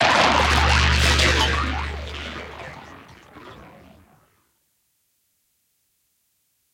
Alien Drain
Other-wordly drain sound.
cinematic, dark, film, sci-fi, sound-design